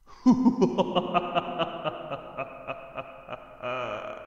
Creepy laugh
A bony figure, clad in ghastly robes and armed with a deathly scythe, enters the room ready to kill its inhabitants.
Recorded using a microphone and my voice for my RPG Maker 2003 game "El Rufián de Akoria". Belongs to Dier Cranger, an non-player character and first boss of the adventure.
Strange, Creepy